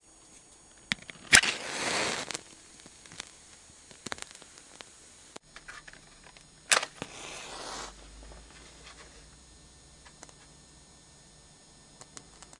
MATCH BEING STRUCK 2
Sound of a match being struck on matchbox and igniting
Sound recorded on mini DV tape with Sony ECM-MS01 CONDENSER MICROPHONE
flare, ignite, Match-struck